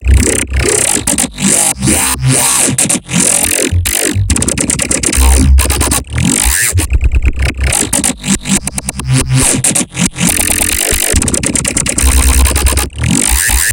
just a sample of what my basses sound like :)
You Don't have to, but its the most you could do :)
bass, dubstep, flstudio, growl, heavy, modulation, monster, vocoder, wub